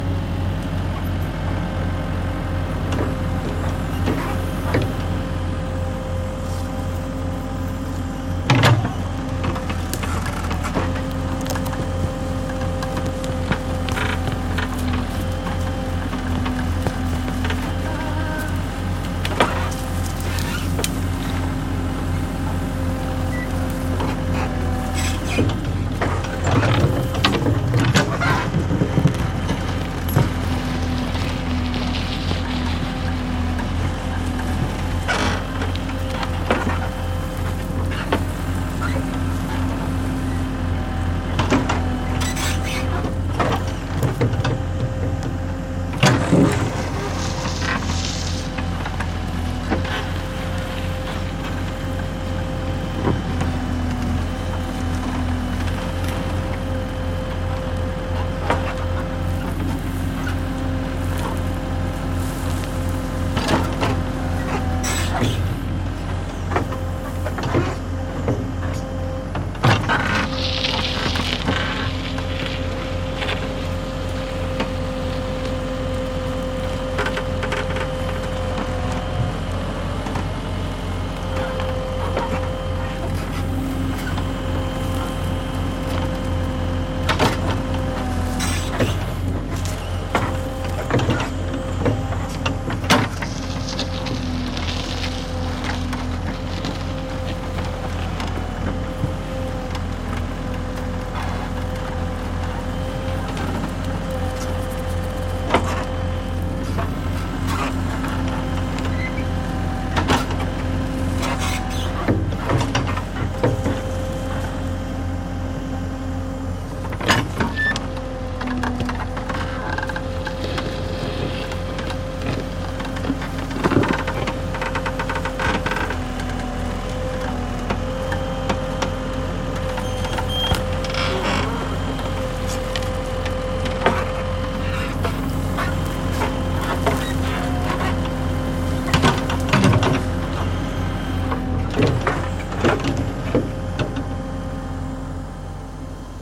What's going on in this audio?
excavator MF 860 exterior engine digging in the ground metallic hits close with engine mono
This sound effect was recorded with high quality sound equipment and comes from a sound library called Excavator MF 860 which is pack of 83 high quality audio files with a total length of 145 minutes. In this library you'll find various engine sounds recorded onboard and from exterior perspectives, along with foley and other sound effects like digging.
car, clunk, construction, dig, digger, drive, driving, effect, engine, excavator, exterior, gas, grass, ground, heavy, machine, machinery, mechanical, mf, pushing, sound, vehicle